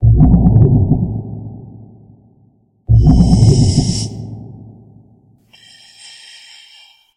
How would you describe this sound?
Underwater effect made by blowing bubbles with a straw.